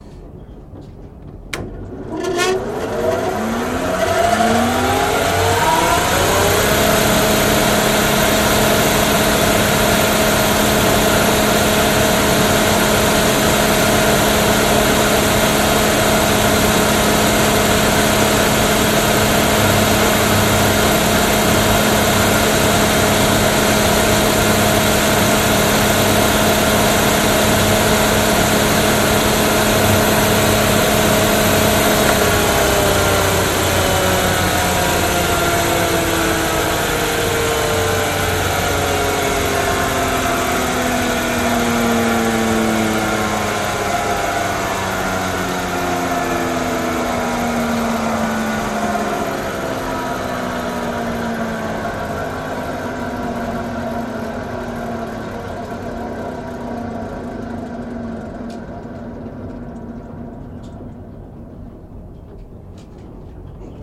SE MACHINES MILL's mechanism 04 - engine starts and stops
One of the machines in watermill.
rec equipment - MKH 416, Tascam DR-680
mill, factory, machinery, machine, industrial